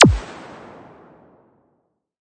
a nice big zippy zap